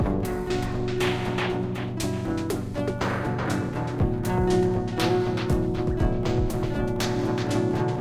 Like a Dream loop
recorded with looping pedal